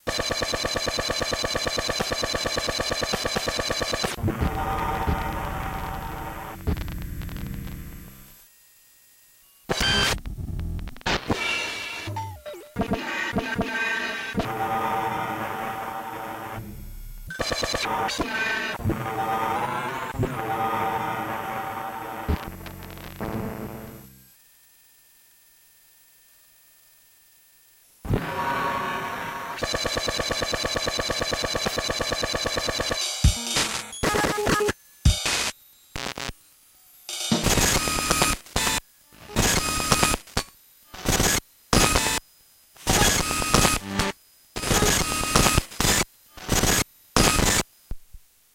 Circuit bent drum sounds
Circuit bent Casio MT-260 with added patchbay producing unusual drum patterns and glitches.
distorted, bent, glitch, circuitry, casio, synth, panning, right, electronic, hard, drums, circuit, left, noise